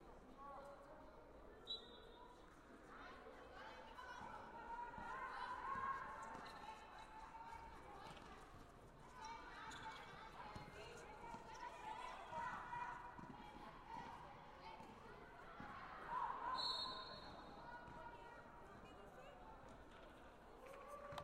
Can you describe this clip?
Omni Ambiental BasketBallGame
basketball, game, sports